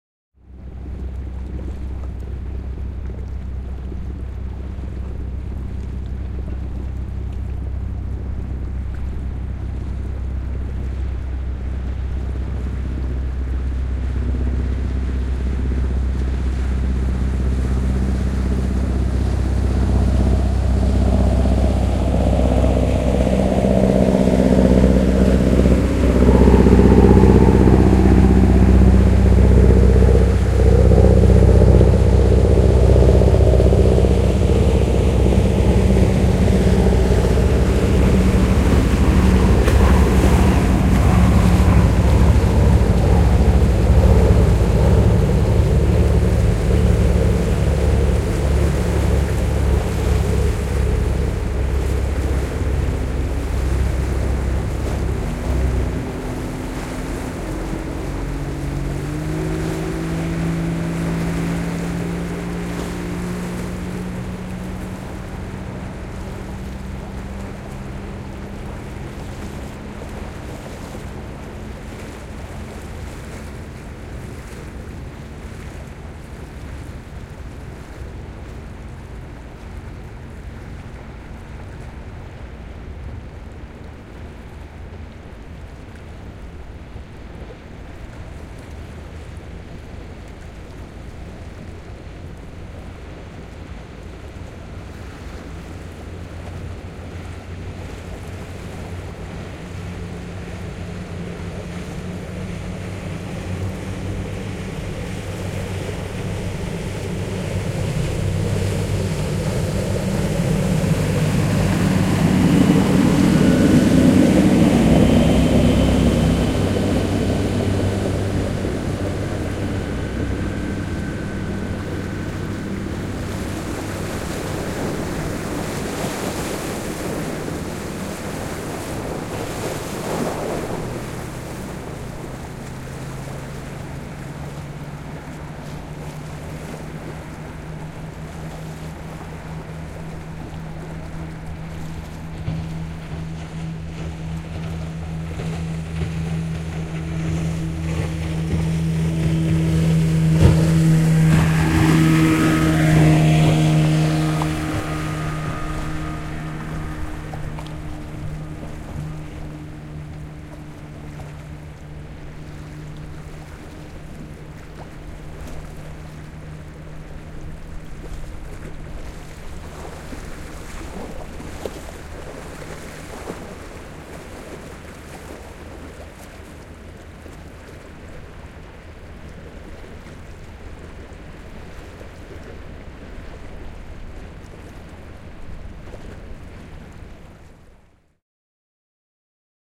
Veneitä ohi salmessa / Boats passing in a narrow strait, waves
Moottoriveneitä ohi kapeassa salmessa. aaltoja rantaan.
Paikka/Place: Suomi / Finland / Barösund
Aika/Date: 25.07.1991